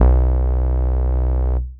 SYNTH BASS 0105
SYNTH BASS SAW
bass, saw, synth